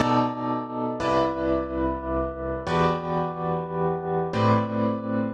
beautiful piano chord loop with tremolo
beautiful, loop, vibes, chill, smooth